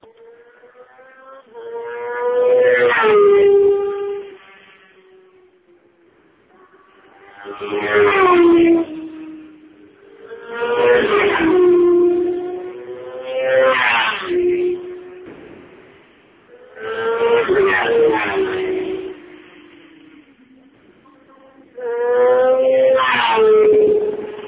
full power sound of kawas bike during high speed!!!
green, mobile, kawasaki